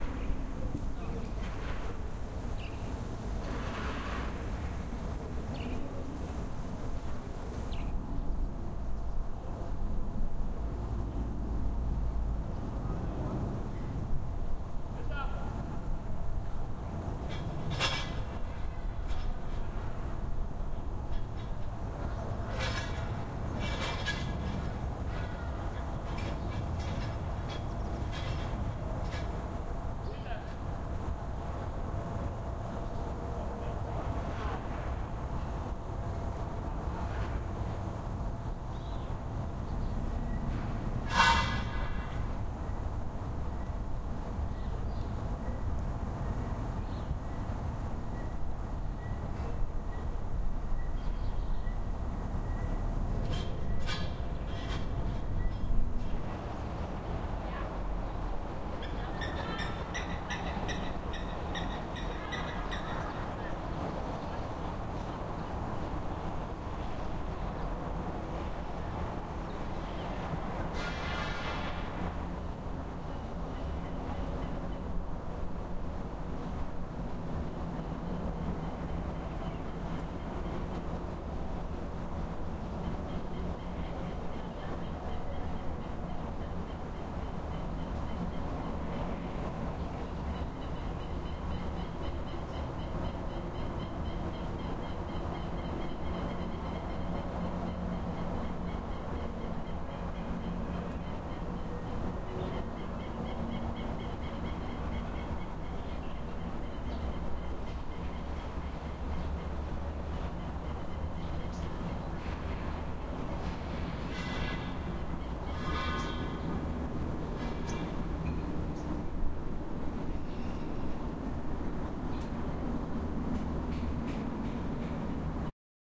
Apartment buildings ambiance: Ambiance of estate apartment buildings, outside, subtle building of scaffolding and builders mumbling in background, birds are also present in recording. Recorded with a Zoom H6 recorder using a stereo(X/Y) microphone.
Apartment-building, Apartments, Estate, OWI, Outdoor-ambiance, Scaffolding, field-recording